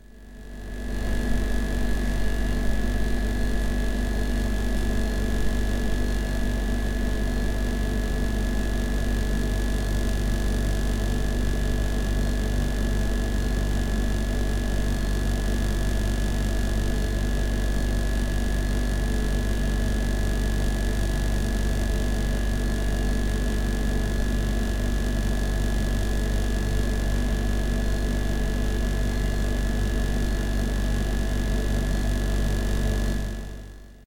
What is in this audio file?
Closed Store, Closed Café
This sound can for example be used in horror scenes, for example when it's quiet and dark with no one around - you name it!
If you enjoyed the sound, please STAR, COMMENT, SPREAD THE WORD!🗣 It really helps!
cafe, cafeteria, closed, coffee, store